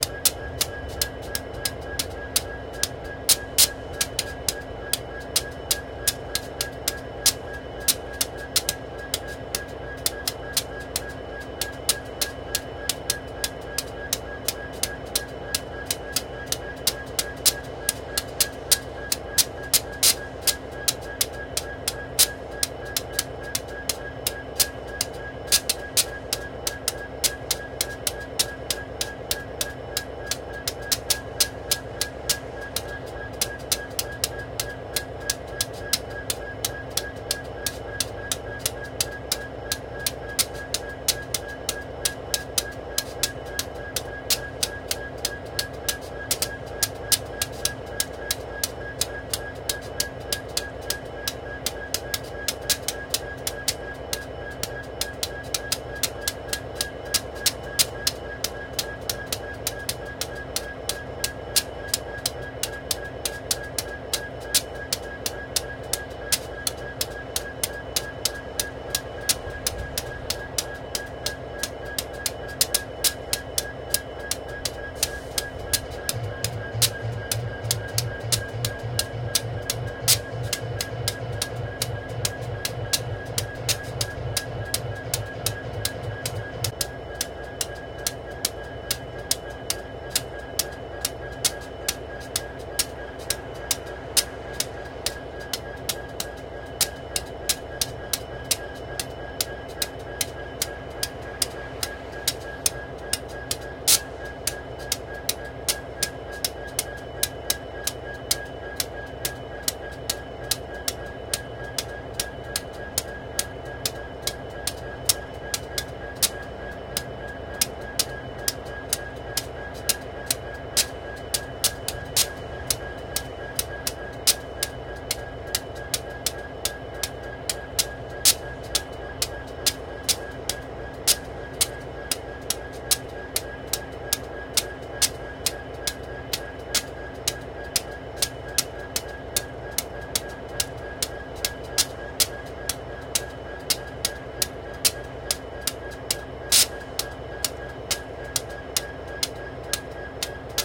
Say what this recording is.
A diesel train flushing out the condensation from a particular valve, as I am told. Sounds like electricity sparking or something. It was quite loud, my gain was set extremely low, enough that I didn't pick up any of the road traffic with cars passing a few feet behind me.
Mic: Audio-Technica AT-875R shotgun mic
Recorder: Tascam DR-40